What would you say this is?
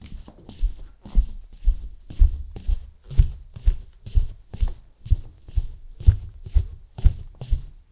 walking in a house2
steps, footsteps, walking, footstep, shoes, walk, floor